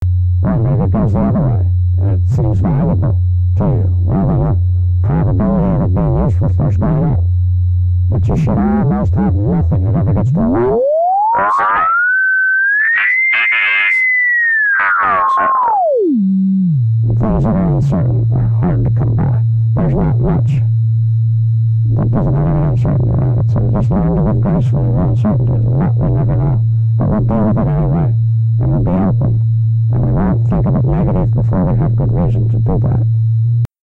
unintelligible radio
unintelligible transmission / alien transmission / broken speaker / radio chatter
electronic, transmission, chatter, speech, robot, broken, noise, signals, male, radio, chat, voice, interference, alien